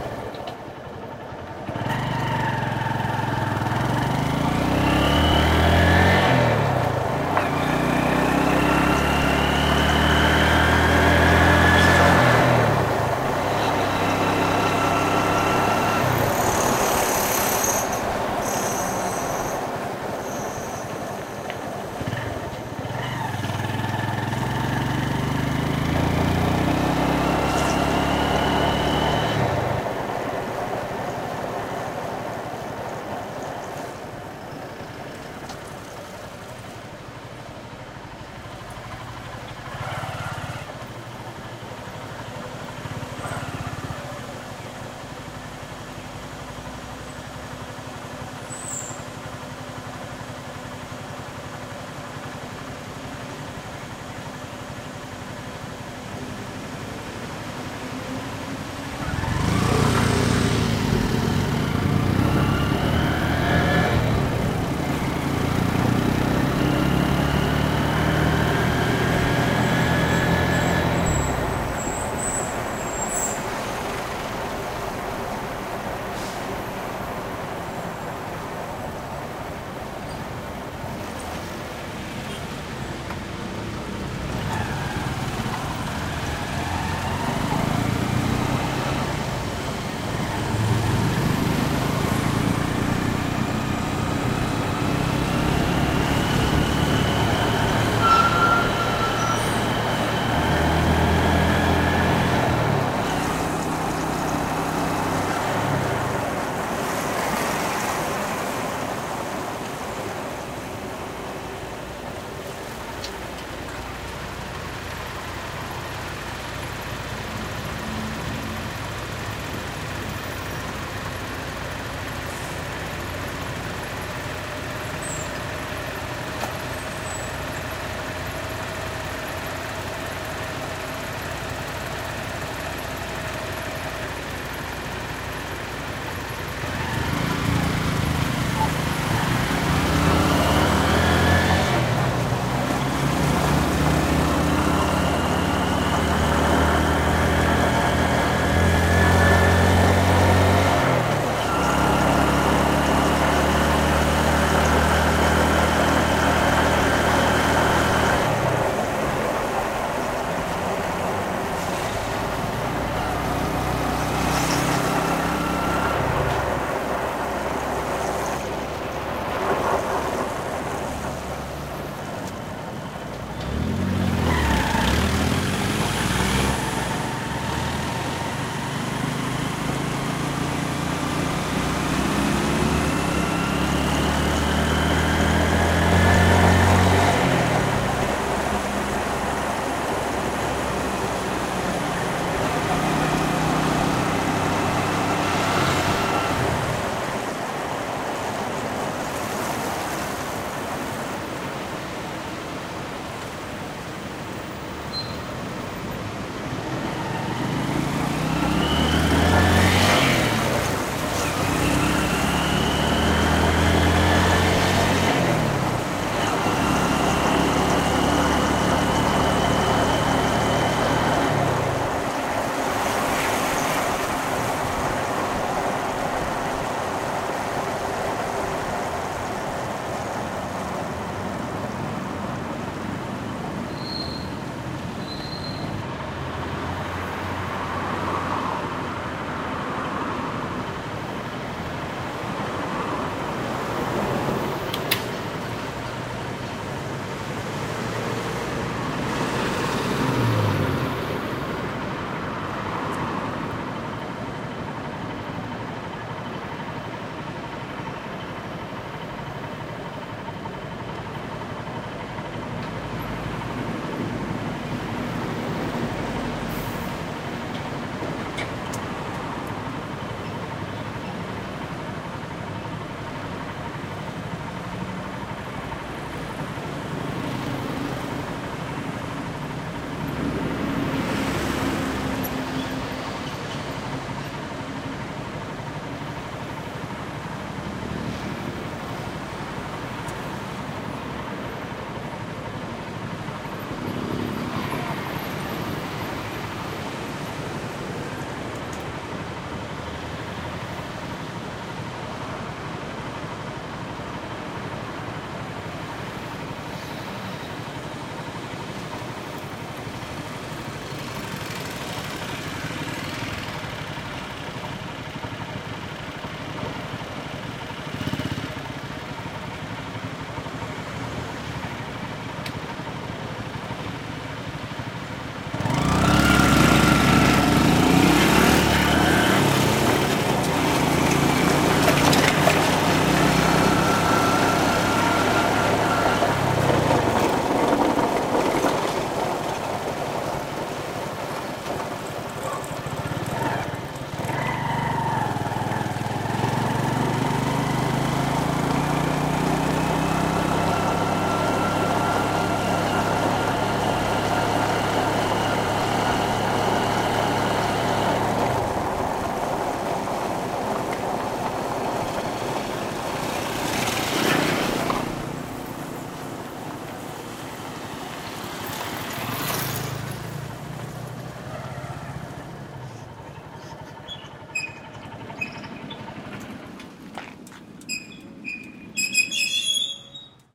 De moto pela cidade entre transito e obras | By motorcycle through the city between traffic and works
Sons do Caminho. De moto-taxi pela cidade. Motor, calor, sol. Trânsito, carros, motos, caminhão. Cidade, asfalto, freio.
Gravado em Belém, Pará, Amazônia, Brasil. Gravação parte do projeto Banco Sonoro Amazônico. Em mono com Zoom H6.
// projeto Banco Sonoro Amazônico
Ao utilizar o arquivo, fazer referência ao Banco Sonoro Amazônico.
Autor: José Viana
Ano: 2022
Way Sounds. By motorcycle taxi around the city. Engine, heat, sun. Traffic, cars, motorcycles, truck. City, asphalt, curb.
Recorded in Belém, Pará, Amazon, Brazil. Recording part of the Banco Sonoro Amazônico project. In stereo with Zoom H6.
// Amazon Sound Bank project
When using the file, make reference to Banco Sonoro Amazônico.
Year: 2022
carros, cidade, city, deslocamento, engine, landscape, maquina, moto, motor, motorcycle, paisagem, speed, transformacao, transformation, transit, transito, velocidade